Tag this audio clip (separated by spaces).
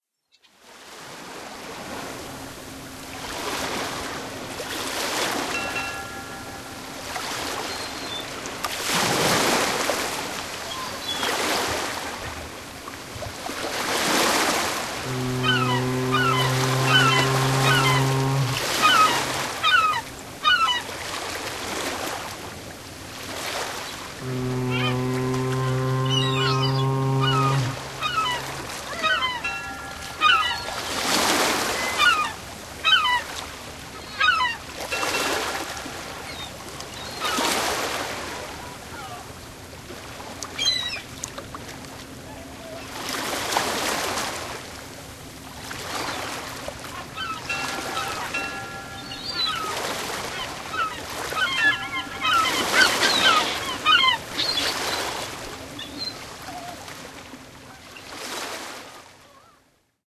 buoy horn ocean remix seagulls waves